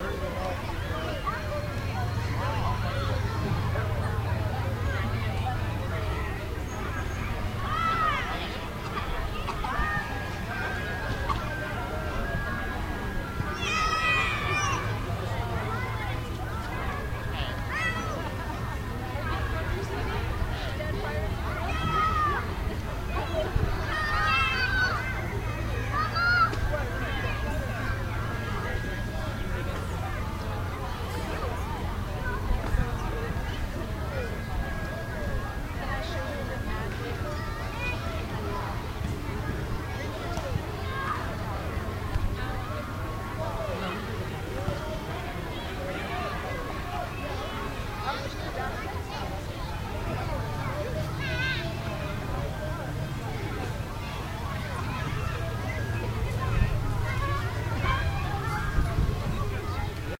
Children Playing
While hanging out at our pool I tried to record an audio drama I am working on, but the background noise was too loud. So I recorded the background noise!
children, field-recording, playground, pool